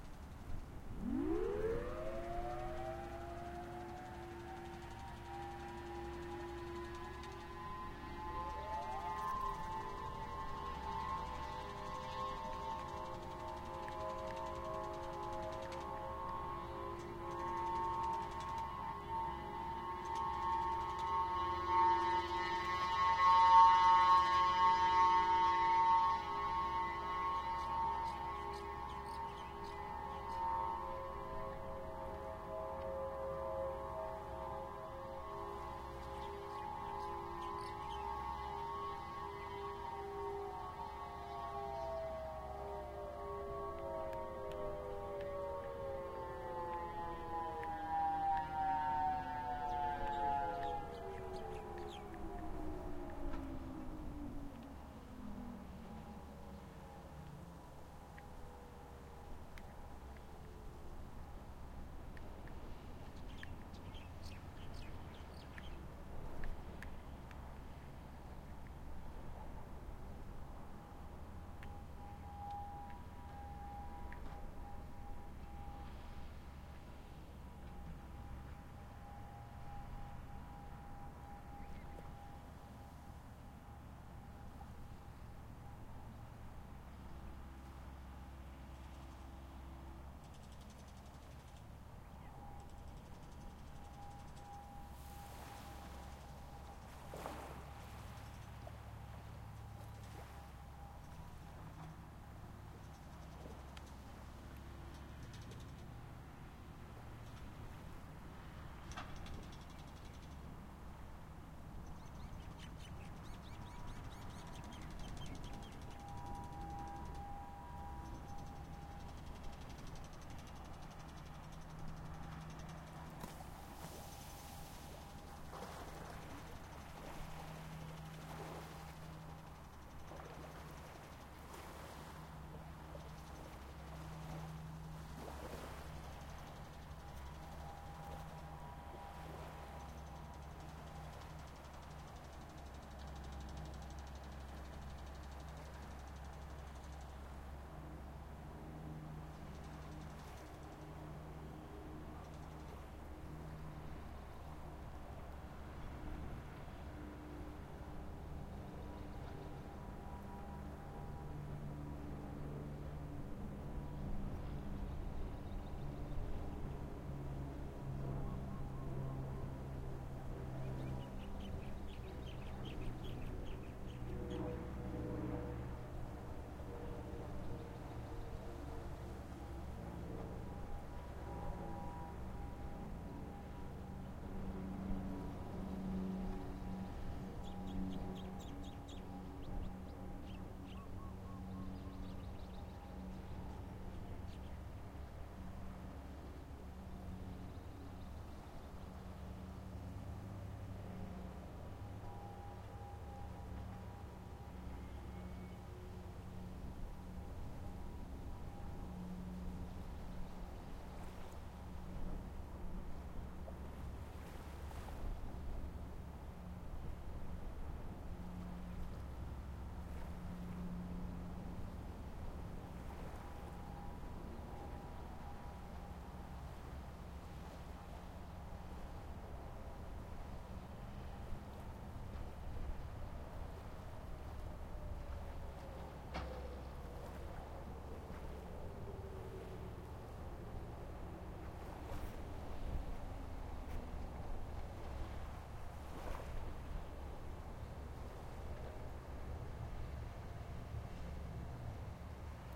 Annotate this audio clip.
Civil Defense test 6-2-2008
June 2nd 2008 civil defense siren warning system test.
Sirens heard: in the order they fire up:
(1)Maunalua Bay- Thunderbolt 1000T, super-high pitched. It was a close 1/4 mile away from my position. You can hear the siren's supercharger.
(2)Niu Valley Shopping Center- STL-10: 1 mile away, busy blowing out the eardrums of customers at the KFC it's located by.
(3)Kuliouou valley- Modulator 3012: 3/4 mile away
(4)Hanauma Bay - Thunderbolt 1000T, normal. 2 miles away, on top of a hill.
(5)Hahaione valley - EOWS 612, faintly heard in the distance after all the other sirens quit. Sounded for more than 4 minutes. 1-1/4 miles away.
Background noises: annoying construction, nice beach waves, light traffic noise, minimal wind.
Recorded with a Roland Edirol R-09: AGC off, low gain, low pass filter on, input level: 30 (max).
hurricane, defense, test, tornado, hawaii, air, disaster, siren, honolulu, raid, civil, tsunami